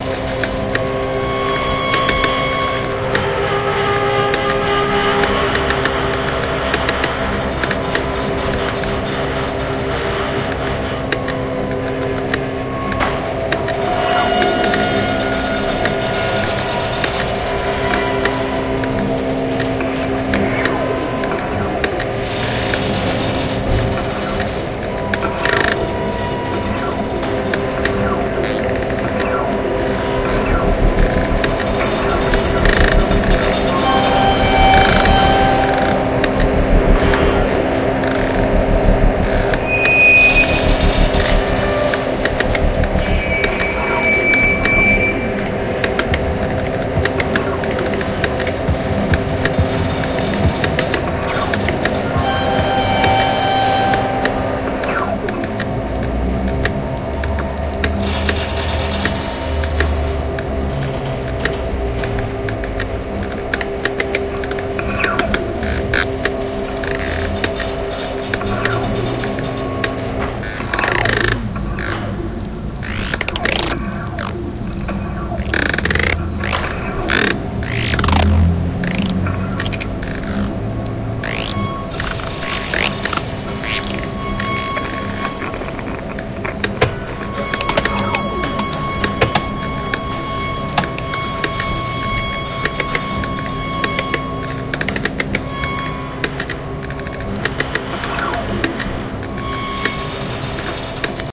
city lights playing cyber songs